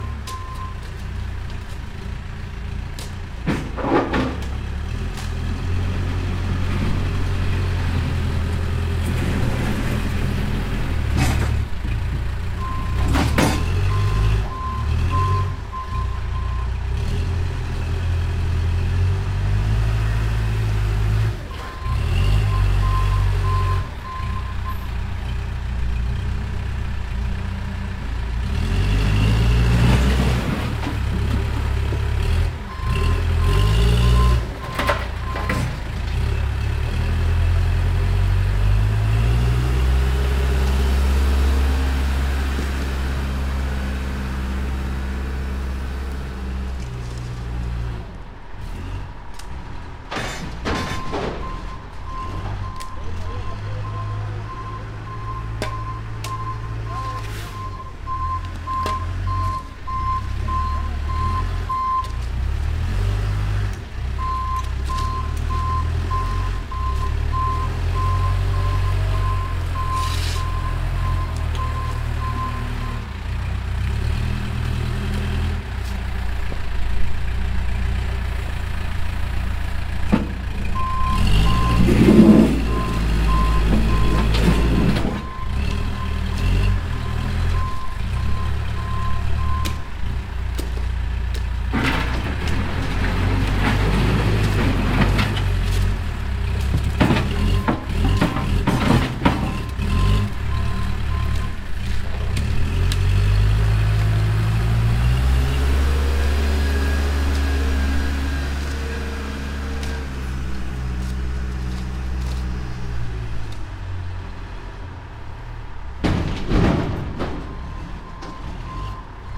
Concrete demolition
This is a Case front-loader/backhoe loading up concrete that was just broken up and loading it to a nearby dump truck. There are a couple workers using shovels and speaking a little bit of Spanish I think. Unedited. No effects.
Equipment: Rode NT3 to Sound Devices 702.
back-hoe, backhoe, concrete, concrete-demolition, construction, field-recording, raw, urban, workers